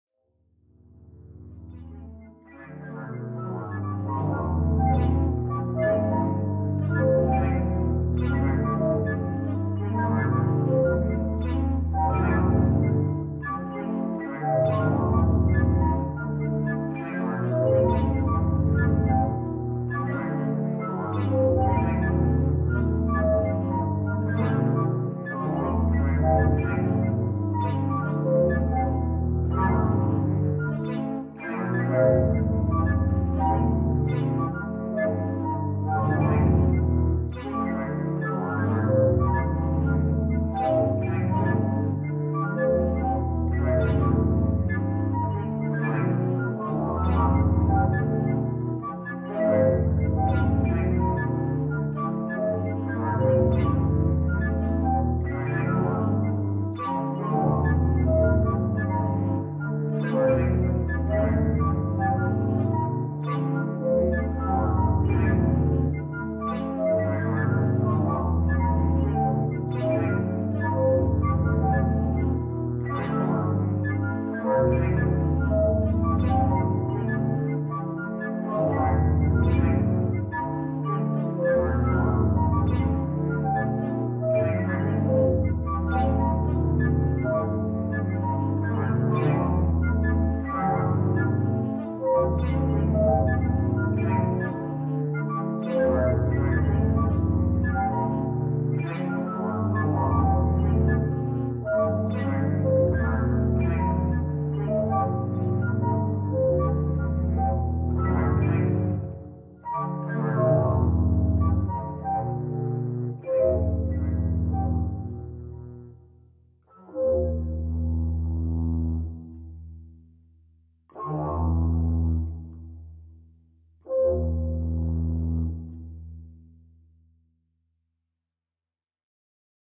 A wired sequence of tones, overlapping, at different pitches, originally produced by a flute. The whole sequence is reverbered.